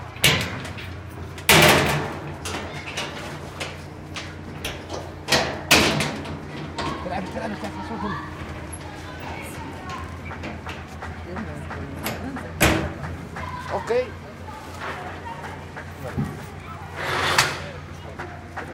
metal sheet cover down rattle lock up outdoor street corner water faucet Palestine Gaza 2016
cover, lock, metal, outdoor, sheet